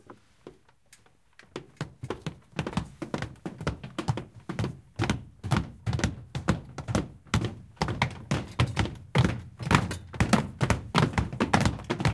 Ecole Olivier Métra, Paris. Field recordings made within the school grounds.